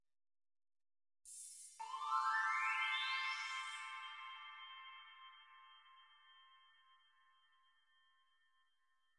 A little rising synth fill.
music, fill, synth, electronic